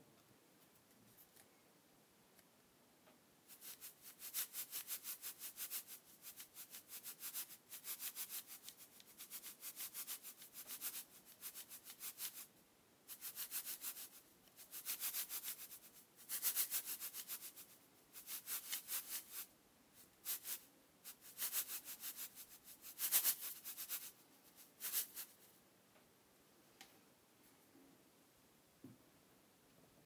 salt shaking flour